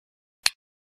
Clicksound
Recorded with a Sony MZ-R35
lego, stone, click